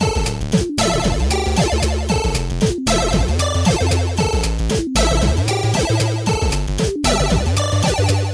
A short SIDlike-loop with tempo-swing. This is from Teenage Engineering PO-20 Arcade synth (calculator like) - Normal EQ
8-bit, chiptune